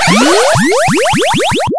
SFX Powerup 30
8-bit retro chipsound chip 8bit chiptune powerup video-game
chiptune, chipsound, 8-bit